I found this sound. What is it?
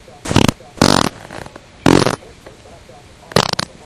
quad farts
fart poot gas flatulence flatulation explosion noise
poot, fart, noise, flatulation, gas, flatulence, explosion